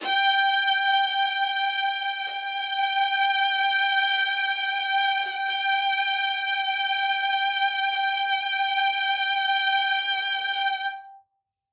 One-shot from Versilian Studios Chamber Orchestra 2: Community Edition sampling project.
Instrument family: Strings
Instrument: Viola Section
Articulation: vibrato sustain
Note: F#5
Midi note: 79
Midi velocity (center): 95
Microphone: 2x Rode NT1-A spaced pair, sE2200aII close
Performer: Brendan Klippel, Jenny Frantz, Dan Lay, Gerson Martinez